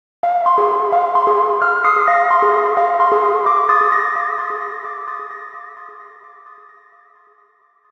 Light spacey sky synth loop from my track Tlaloc's Rage.
[BPM: ]
[Key: ]